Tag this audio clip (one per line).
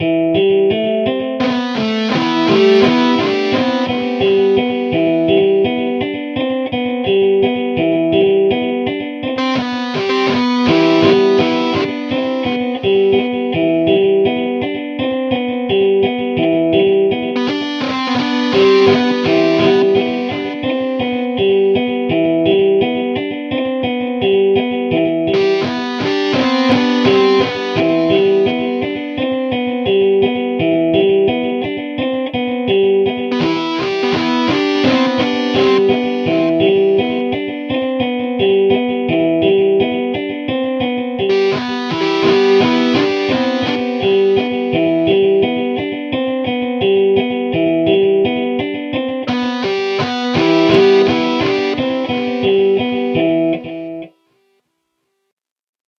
guitar
amplifier
gated
effect
amp
electric-guitar
gate